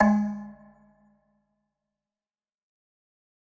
Metal Timbale 022
home, trash, god, record, kit, timbale, drum, pack